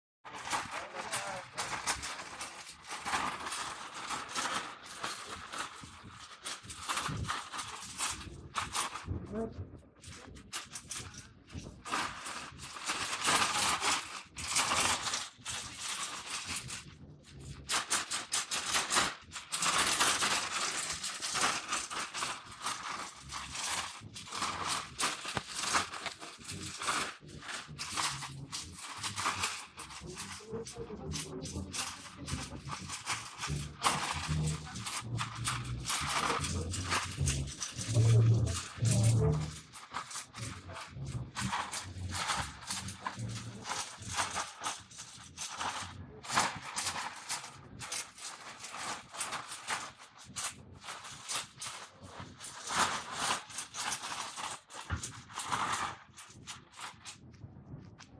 diablito de cascos de refresco
recorrido de un diablero de cascos vacíos por calle Regina
Phoneme, Sinestesia, ritmo